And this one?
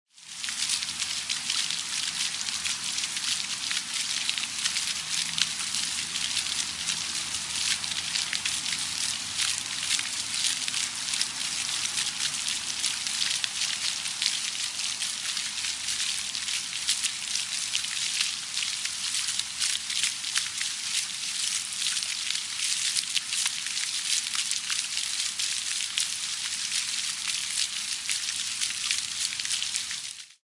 This is a sound recorded during July, 2011 in Portland Oregon.
city, oregon, pdx, portland, running, sound, sounds, soundscape, water